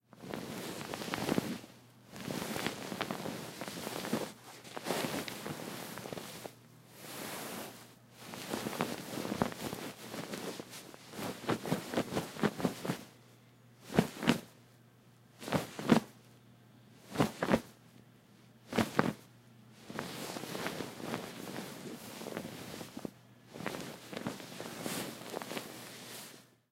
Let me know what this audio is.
Me handling a feather pillow, squishing it and fluffing. Recorded with an Aphex 207D and a Rode NT2.